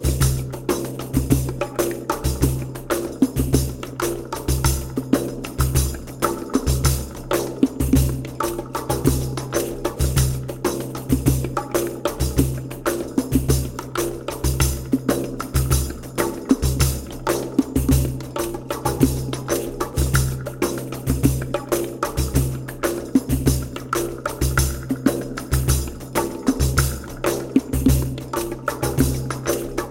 Psychedelic seven percussion loop
A simple 7/8 percussion loop of two frame drums and a bongo drum, with a peculiar "psychedelic" fx feeling (to my taste).
fx, bongo, frame-drum, groove, percussion, loop